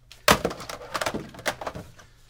Old Plastic Phone Hangup Angry
phone, plastic